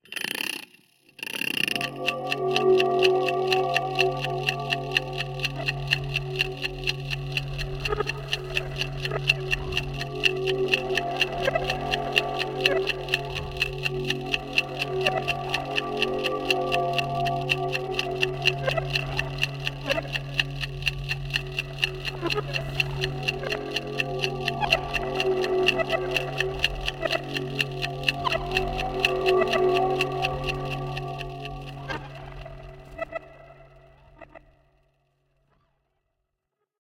Creative Sounddesigns and Soundscapes made of my own Samples.
Sounds were manipulated and combined in very different ways.
Enjoy :)
Atmospheric, Clock, Mechanical, Mechanism, Perpetual, Sci-Fi, Sound-Effect, Soundscape, Synth, Ticking, Time, Timer